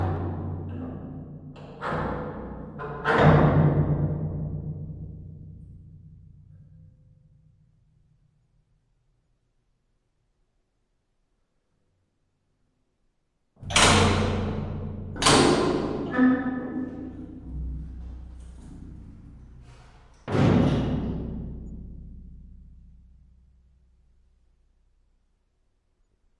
Heavy Gate Metal 01 (underground bunker of civil defense, Vsetin City, Club Vesmir)
Recorded on SONY PCM-D50 in underground bunker of civil defense. Vsetin City, Club Vesmir. Czech Republic
Aleff
basement, bunker, close, closing, door, doors, gate, heavy, metal, open, opening, squeaky